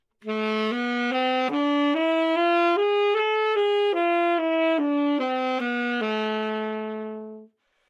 Sax Tenor - A minor
Part of the Good-sounds dataset of monophonic instrumental sounds.
instrument::sax_tenor
note::A
good-sounds-id::6255
mode::harmonic minor
Aminor, neumann-U87